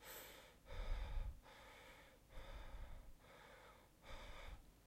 SFX for the game "In search of the fallen star". Plays when the player stops running.